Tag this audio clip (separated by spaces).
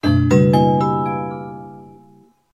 detective horror horror-effects horror-fx reasoning Suspicion